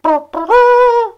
Definitely a real trumpet fanfare
goofy, not-really-a-trumpet, mouth-sounds, silly, trumpet, fanfare